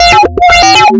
very quick beat made with robotic sounding beeps. Made with audacity. the sound is based on dtmf tones.
audacity, loud, short, fast, quick, robotic, dtmf